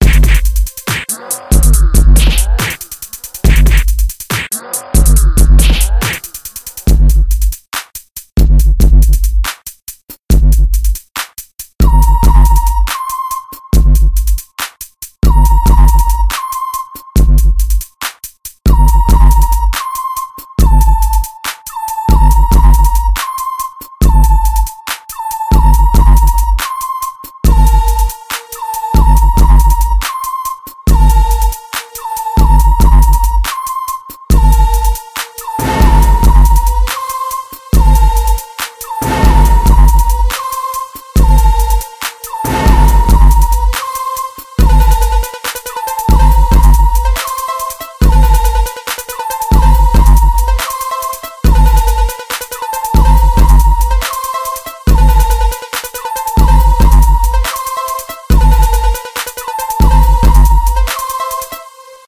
I am a gansta
bass-boost, beat, gansta, hip-hop, instrumental, loop, old-school, punch, sub-bass